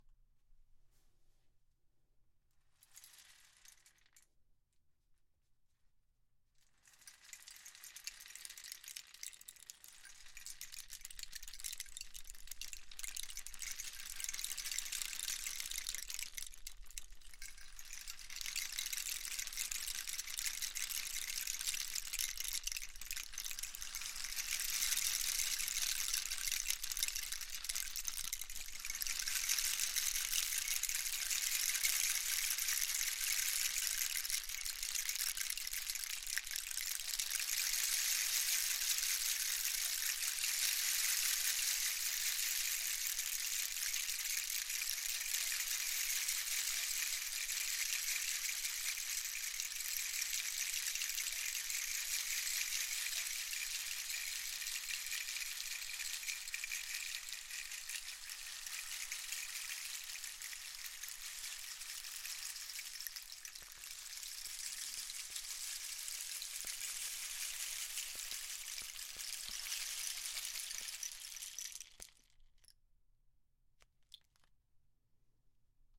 Sound from a South American "rain stick" made from cactus. Recorded May 29, 2016 using a Sony PCM-D50 hand-held recorder with wired Schertler DYN-E-SET. In this recording the stick is tilted slightly to sustain the sound.